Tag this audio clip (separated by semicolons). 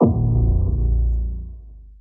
percussion; transformation; wood